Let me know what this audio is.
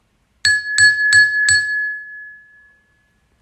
Plato y Cuchara
Ding ding ding
Bell, Ding, Ting